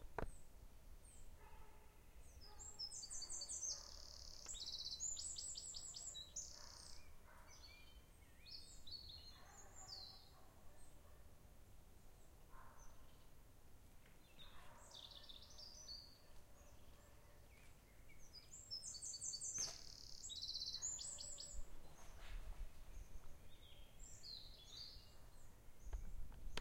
amb - cecebre 08 chu
amb, ambience, birds, forest, trees